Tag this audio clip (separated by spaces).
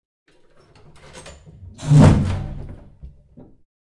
door foley weird close